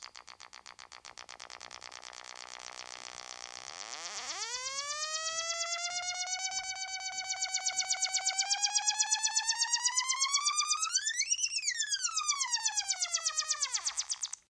Sound effects created with Korg's Monotron ribbon synth, for custom dynamics and sound design.
Recorded through a Yamaha MG124cx to an Mbox.
Ableton Live